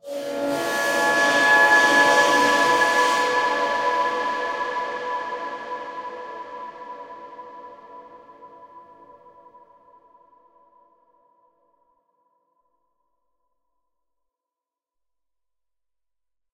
A bowed crash cymbal with some processing.